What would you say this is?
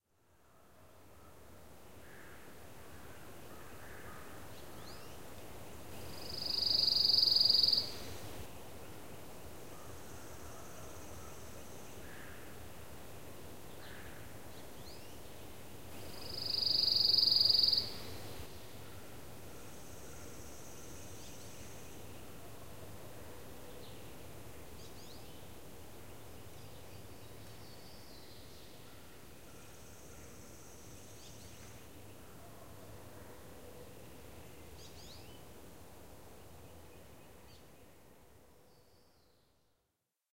PineWarblerTrillApril132013PineGrove
A recording of the beautiful, trilling song of the Pine Warbler. Saturday April 13th, 2013. Made at 6:30AM in a pine grove, using my Handy Zoom H4N recorder with its built-in microphones.